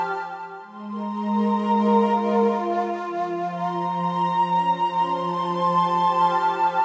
This loop has been created using program garageband 3 and the plug inof the Universal Sound Bank Sonic Box Boom using a Syntesizer from the list of instruments
atmospheric, synt